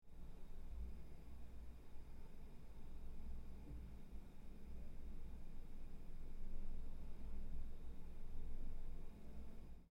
32-Ruido de fondo
office, animation, foley